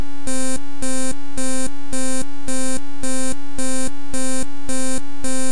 A glitchy electronic sound made from raw data in Audacity!